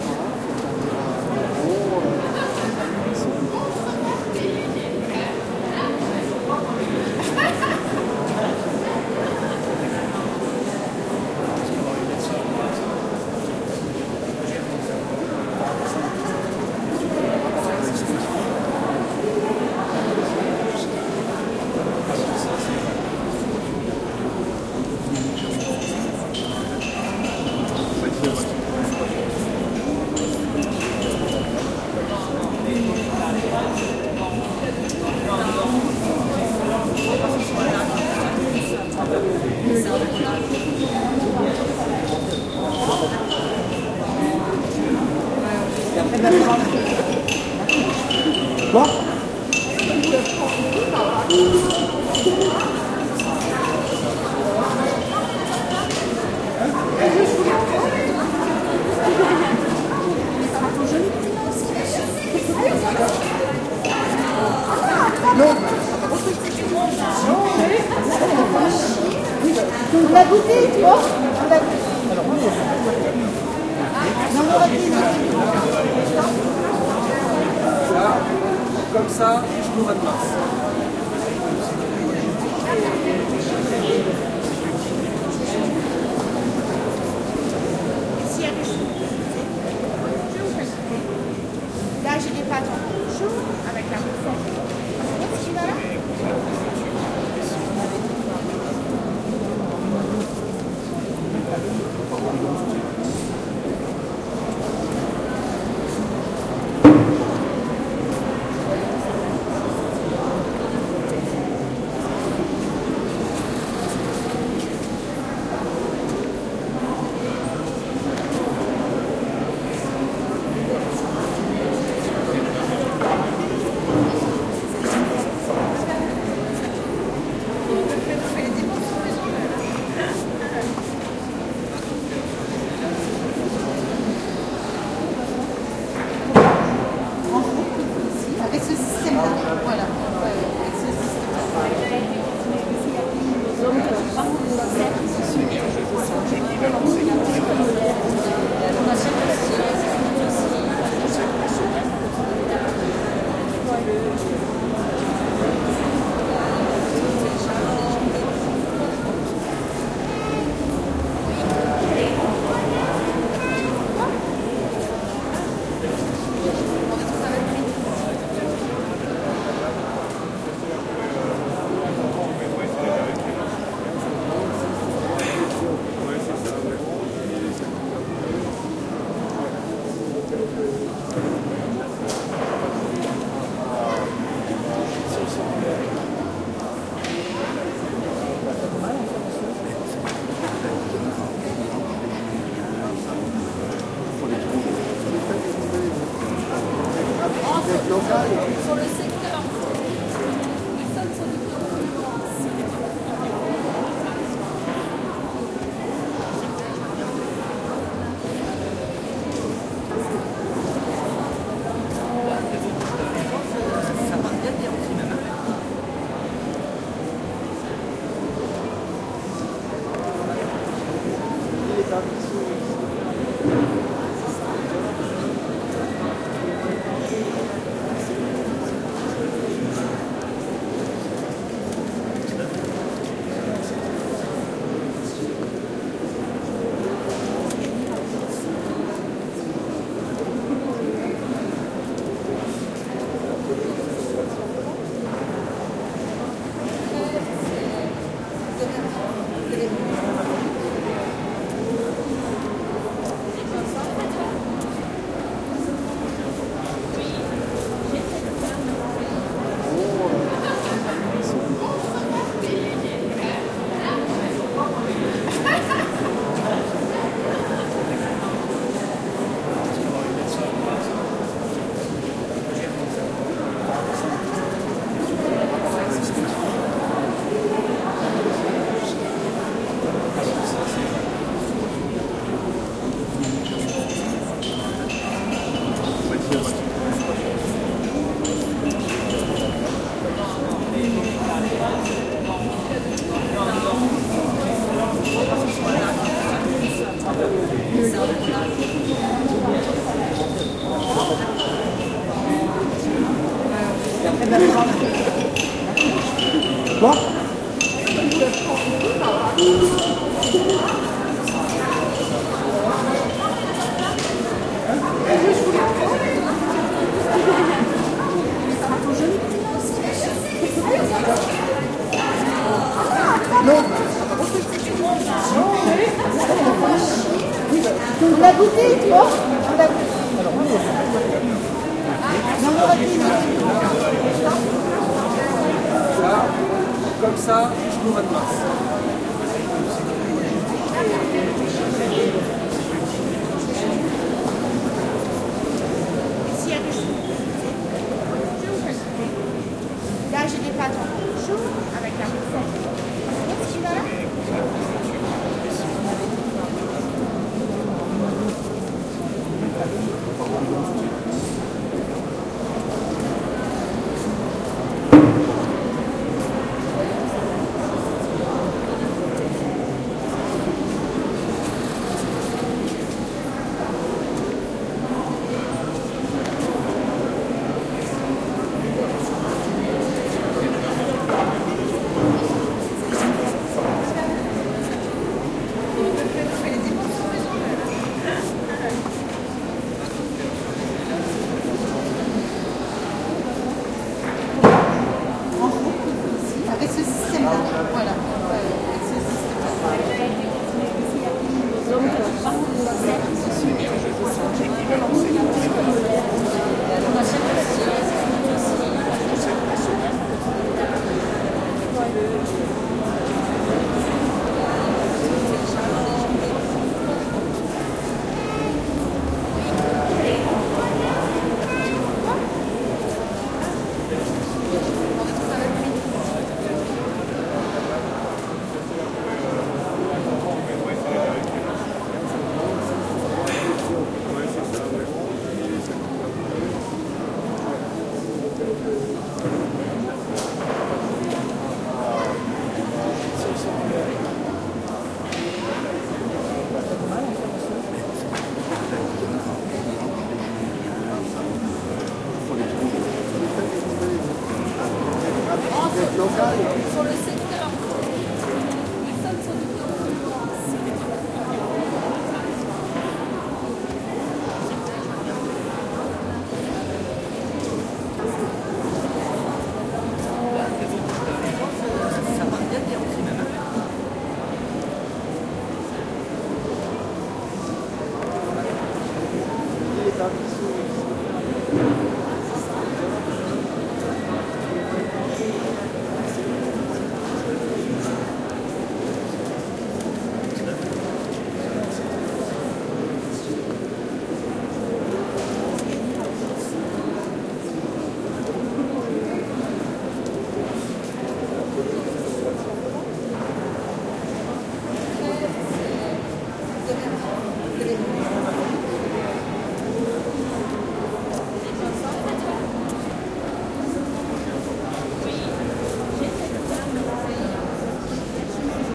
Ambiance d'un salon marchand, en France.
Atmosphere of a trade show, in France.
general-noise, atmosphere, soundscape, atmos, background-sound, background, noise, sounds, atmo, effect, ambiance, ambience, ambient, sound